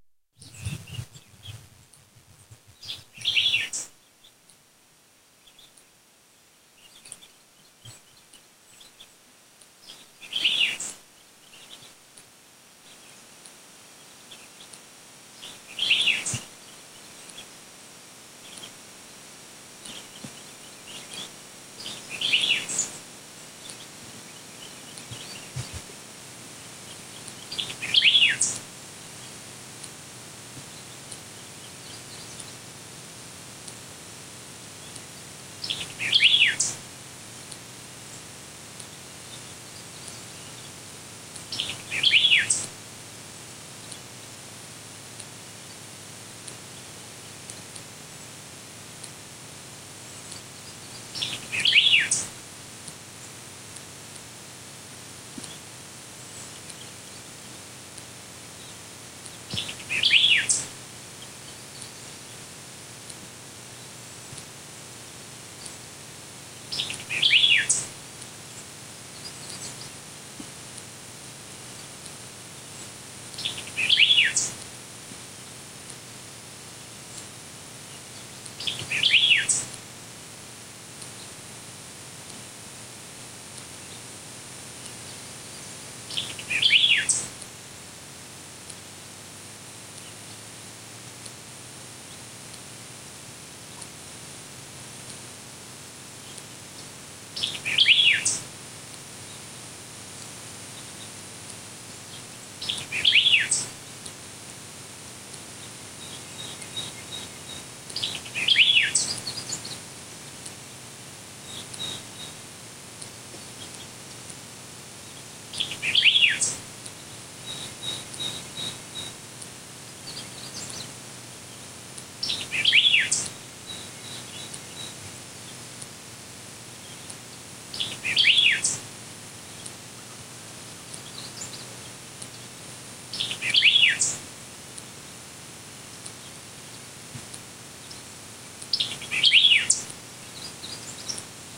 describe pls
White River birds 2
The sound of birds along the forested White River in Arkansas.
bird, field-recording, forest